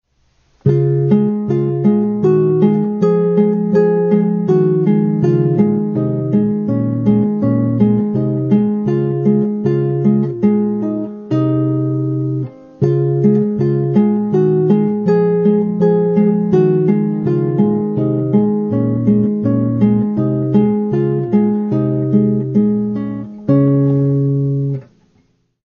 ODE TO JOY(partial)
Study, Beethoven, Short, Guitar, Classical